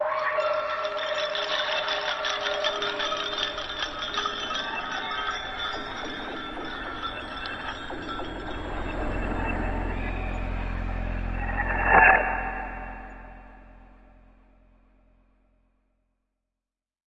Space Cows
Tweaked percussion and cymbal sounds combined with synths and effects.
Percussion, Atmo, Abstract, Atmospheric, Bell, Bells, Melodic, Sound-Effect